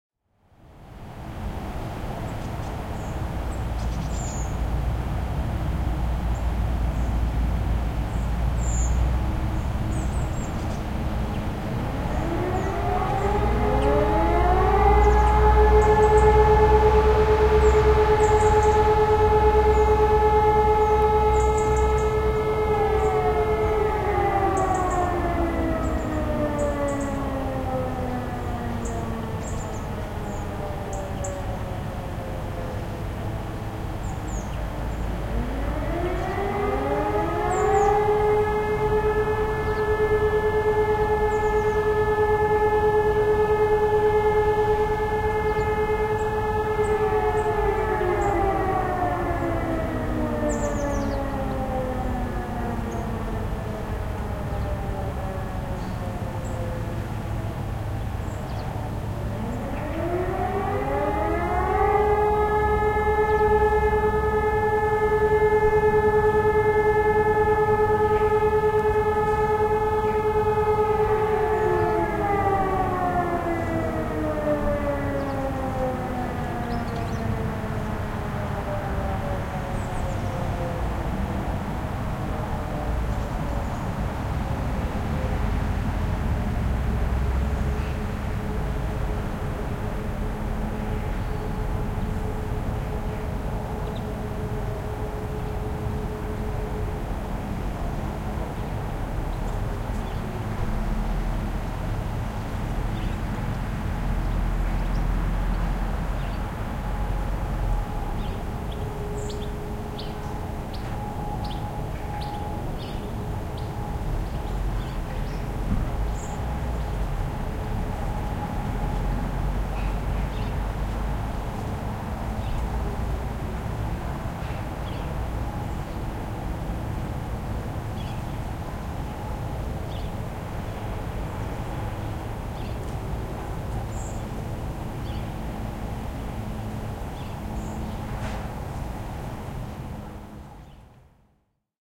Fire siren in the village - unprocessed
The monthly test of the fire siren in my home village recorded with Rode M5 matched pair in ORTF. No processing but normalized in gain. For comparison please listen to the heavily processed version.